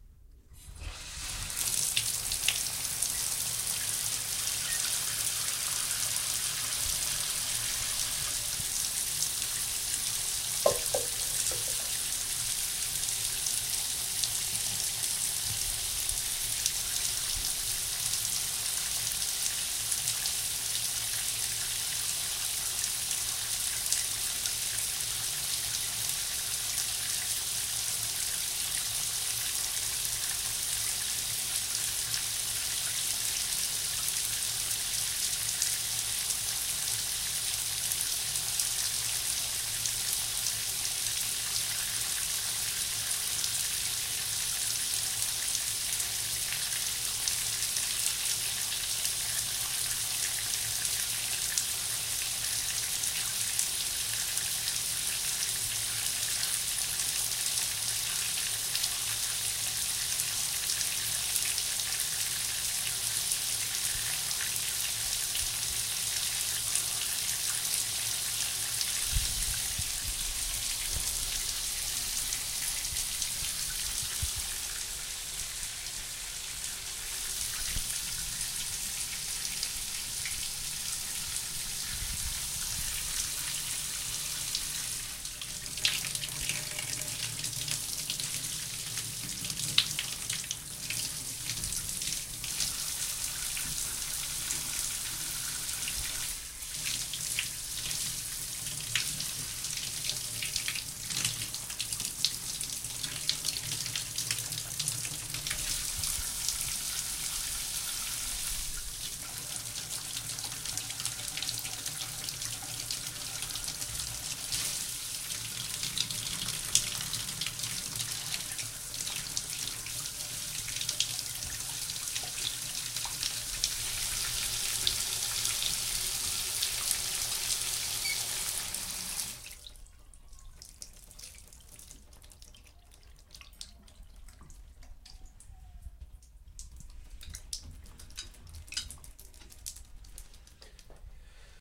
stall shower
A shower... start to finish
SonyMD (MZ-N707)
shower, splash, water